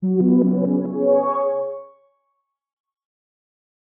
Some kind of welcome sound for your software.
gui, intro, software